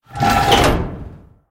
Spaceship Door Open
A spaceship door sound made for a game jam game.
door, heavy, mechanical, metal, open, opening, spaceship